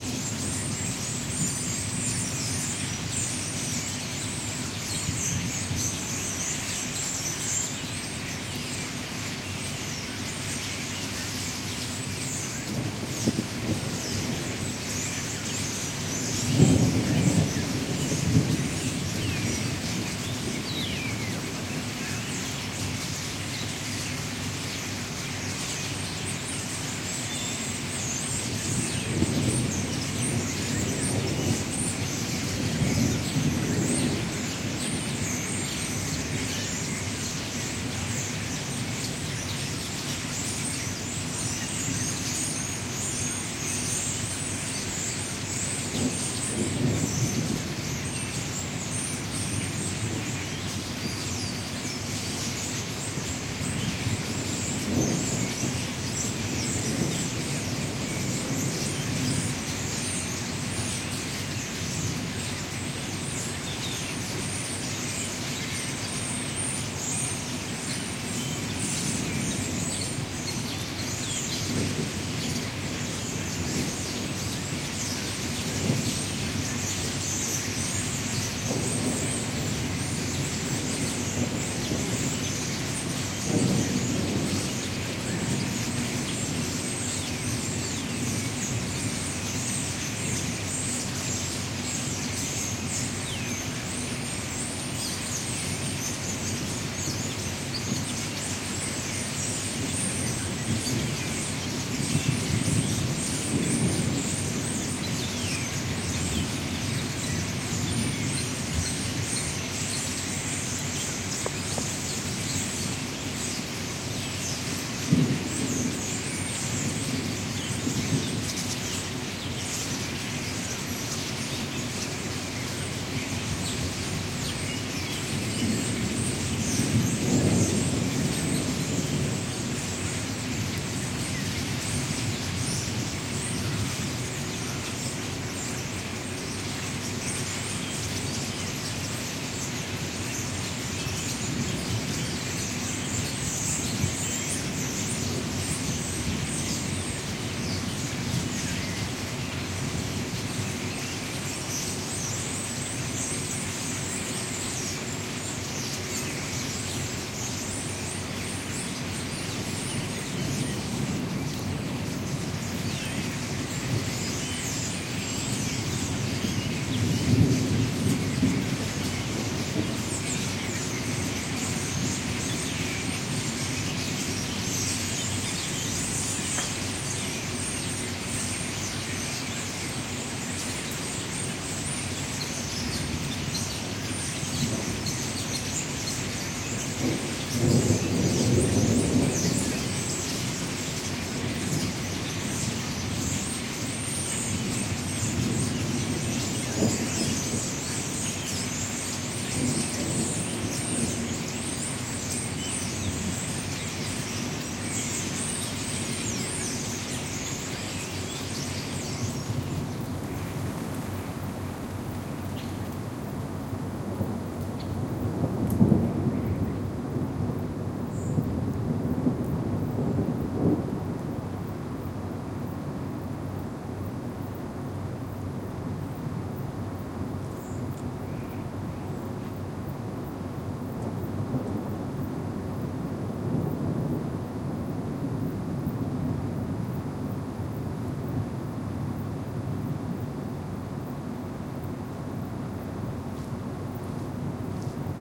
VELESAJAM PTICE NA DRVETU DVORISTE PAV16 GRMI SEVA
Zagreb fair, open space (yard), trees between buildings. Many birds sing at the trees then fly away. Some thunders and distant rumble. Wind. Trees and leaves rustling. Recorded with tascam dr-05.
fly, small, ambiance, birds, fair, open, 3am, dawn, ambience, space, soundscape, many, zagreb, atmosphere, away, field-recording, atmos